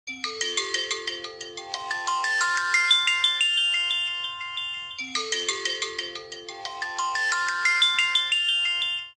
A simple alarm clock that sounds like one of your phone